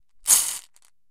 marbles - 15cm ceramic bowl - shaking bowl half full - ~13mm marbles 03
Shaking a 15cm diameter ceramic bowl half full of approximately 13mm diameter glass marbles.
ceramic-bowl, shaken, glass, marble, bowl, glass-marbles, shaking, shake, ceramic, marbles